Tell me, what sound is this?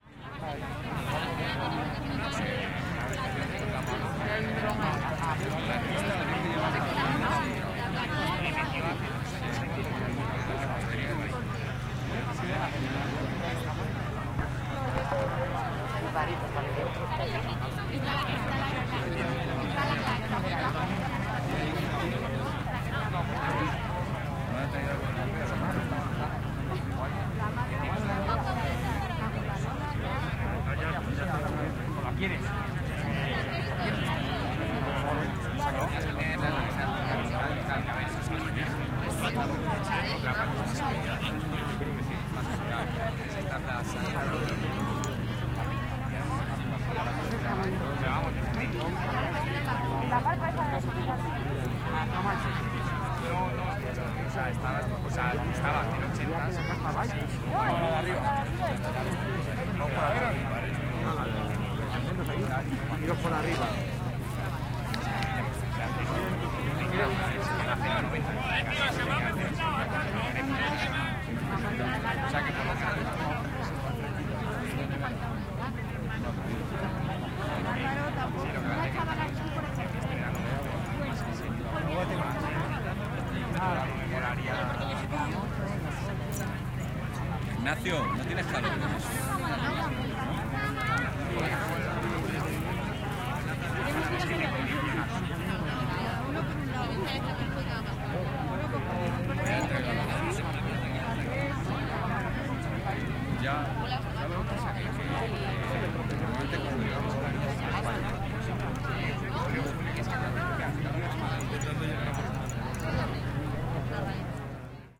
Medium Crowd Ambience Outdoor Talking Background Spanish STR Zoom H4nPro
People talking in Spanish. Medium crowd size.